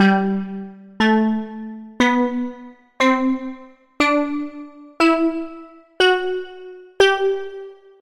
Rainforest Scale 2
Pitched percussion scale.